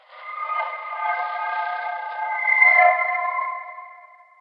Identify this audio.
Accordion transformation 1
accordion high pitch sample, filtered and pitch alteration